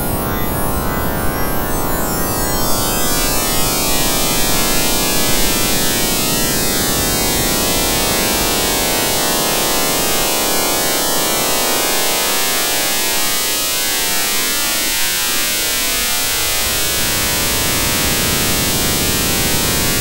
check your volume! Some of the sounds in this pack are loud and uncomfortable.
A collection of weird and sometimes frightening glitchy sounds and drones.
20 seconds of tense droning horror sound. Created by running a picture of an old car through audiopaint and adding a jitter effect!